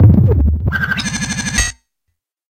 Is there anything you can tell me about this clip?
sonokids-omni 05
beep, abstract, filter, cartoon, analog, strange, game, electronic